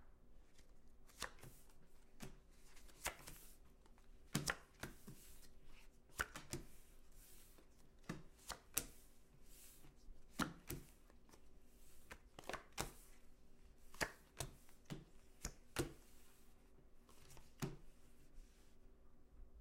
Throwing Cards On Table
Continuously throwing cards on a table, passing them around.